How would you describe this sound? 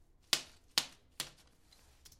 FX Hojas golpes
Golpes de hojas sobre una mesa de madera